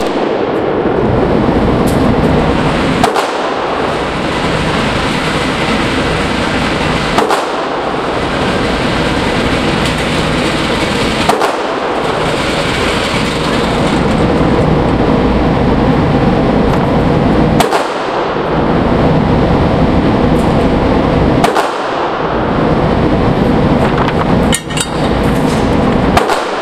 GunRange Mega11
Shots from 9mm or 45 caliber
gun, twenty-two, millimeter, indoor, 9, 22, facility, range, target-practice, shooting-range, nine, caliber, target, shots